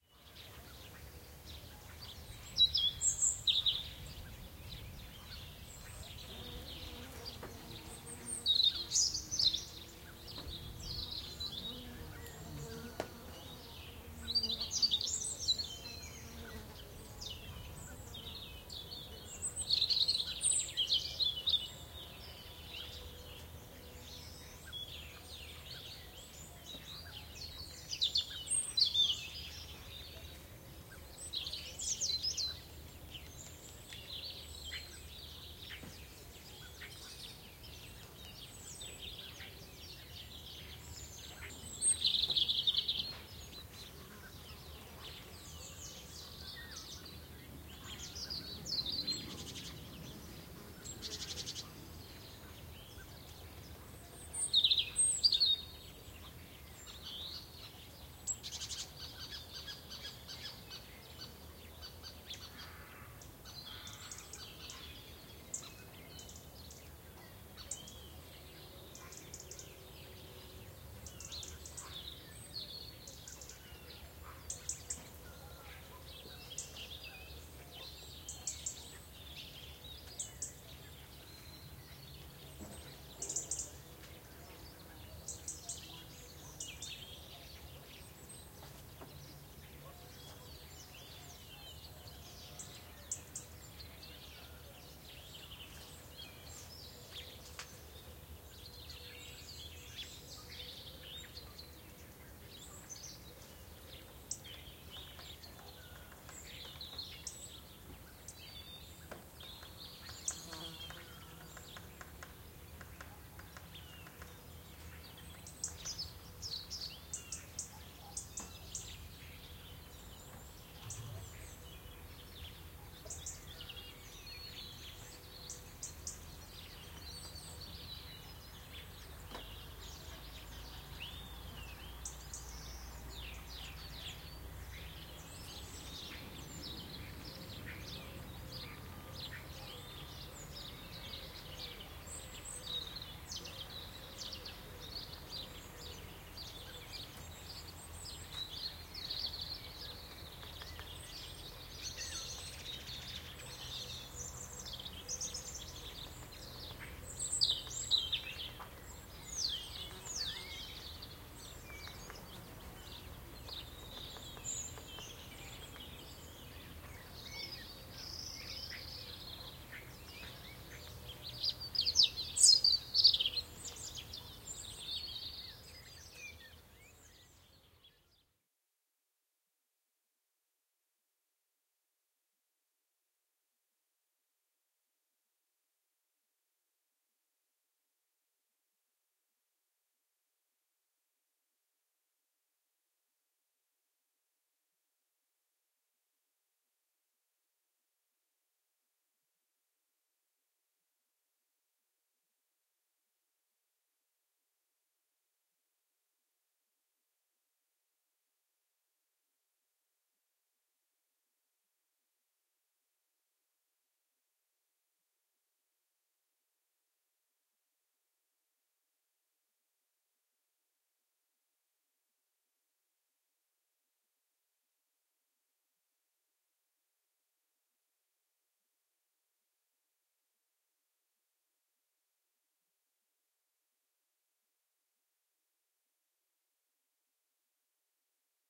amb - outdoor nature birds
ambiance, ambience, birds, field-recording, galiza, nature